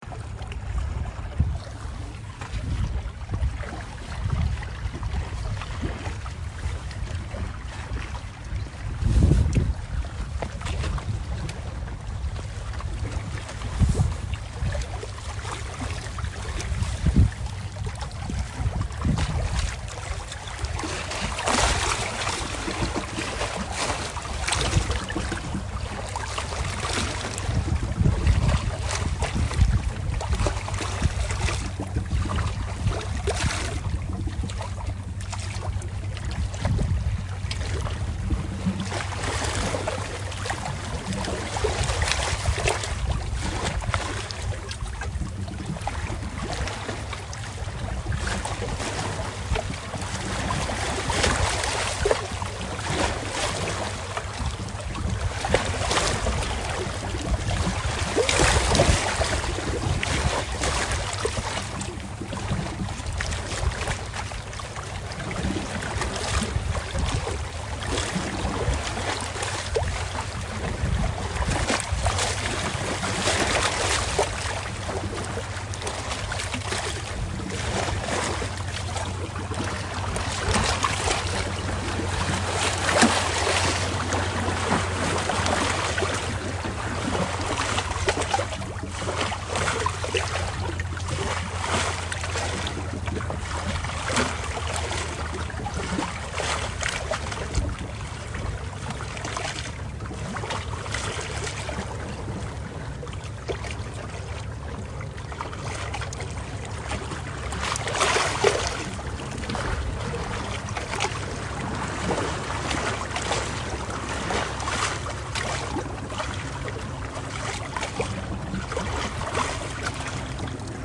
Soft ocean waves sounds
I was walking by a park near Ocean. Did sound recording by iPhone of wave crashing on rocks. It was not too hard. Its so soft and Its so relaxing. Enjoy the sound. Use on your project. Thank You
seashore, splash, surf, lapping, water, seaside, beach, wave, coast, sea-shore, shore, breaking-waves, field-recording, waves, sea, ocean, sound, relaxing